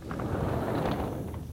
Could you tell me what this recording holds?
Drag sound effect I made for a video game I developed.